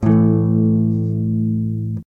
Jackson Dominion guitar. Recorded through a POD XT Live, pedal. Bypass effects, on the Mid pickup setting.
chord, electric